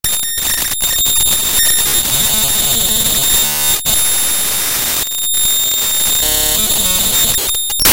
Raw import of a non-audio binary file made with Audacity in Ubuntu Studio
binary, computer, data, digital, distortion, electronic, extreme, file, glitch, glitches, glitchy, harsh, loud, noise, random, raw